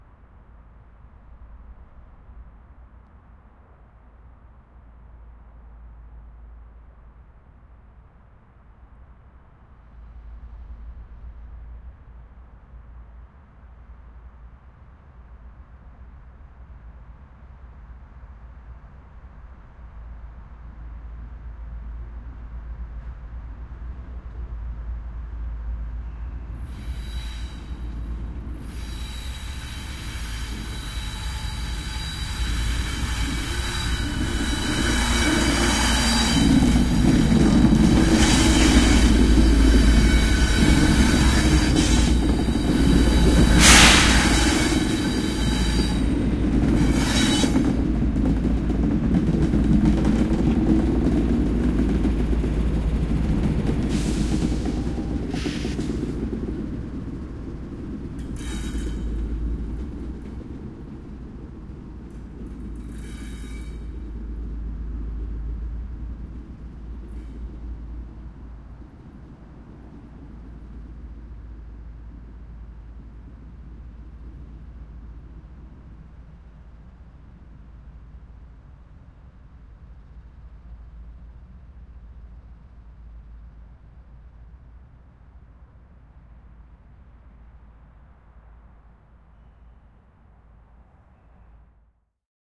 A few diesel engines pass from right to left. There is a lot of dynamic range so turn it up for best results. There is a really nice shot of steam or something right in front of the mics.
Recorded with a pair of AT4021 mics into a modified Marantz PMD661 and edited with Reason.